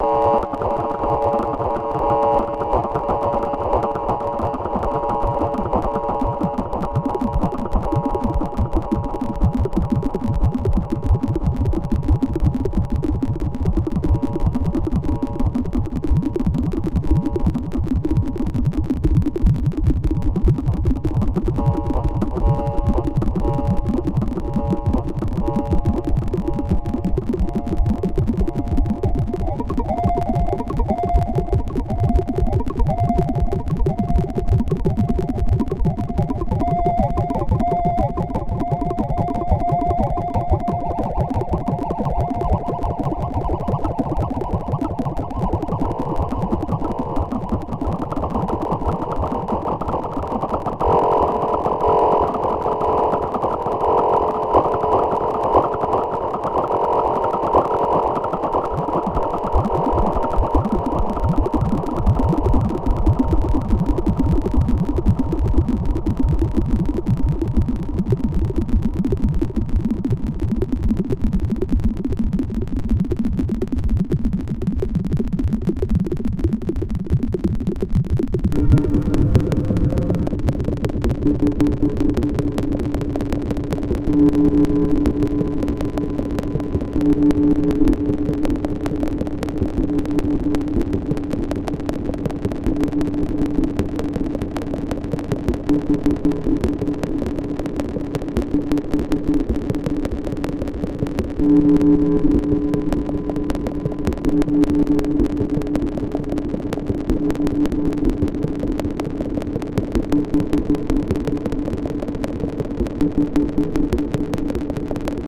cricket like sound/atmo made with my reaktor ensemble "RmCricket"
ambient, synthetic